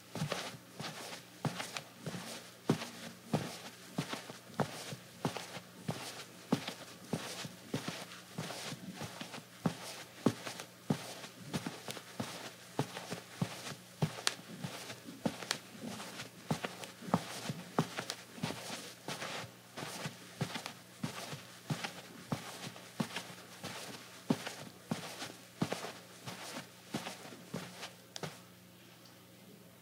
Slippers on rug, slow pace